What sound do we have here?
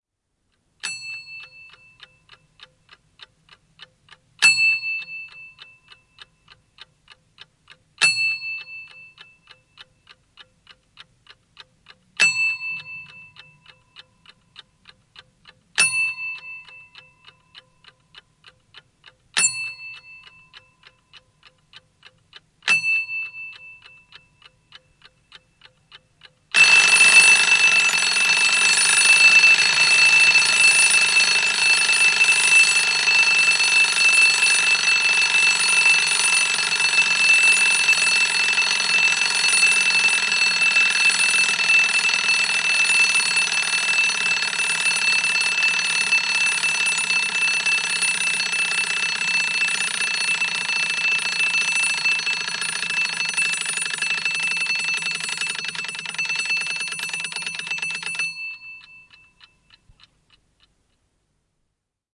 Mekaaninen herätyskello, varoituspimpahduksia, soi piristen kunnes veto loppuu. (Diehl Cavalier).
Paikka/Place: Suomi / Finland
Aika/Date: 02.01.1966